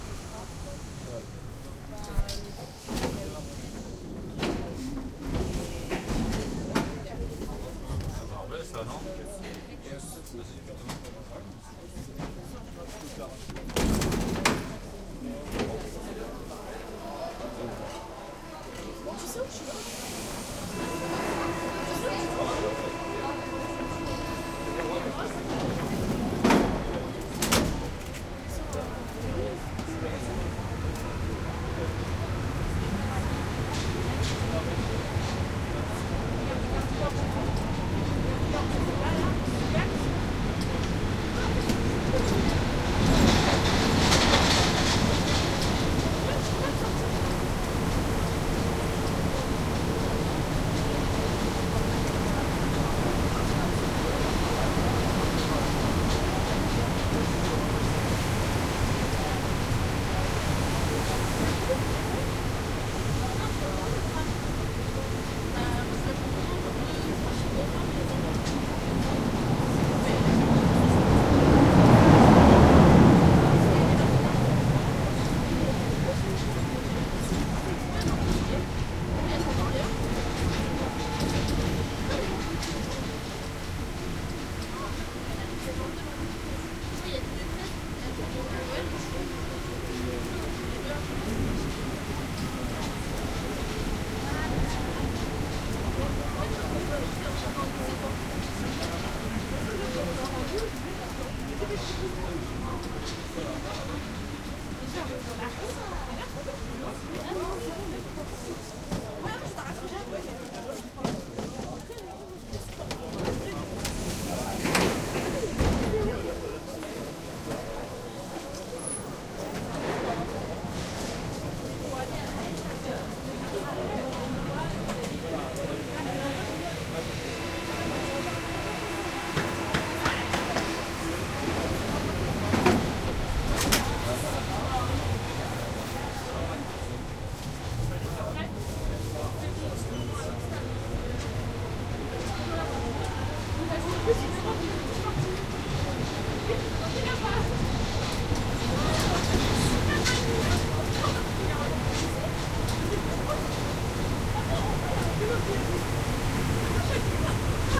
inside of the Paris metro.
doors, metro, people, subway, talking, urban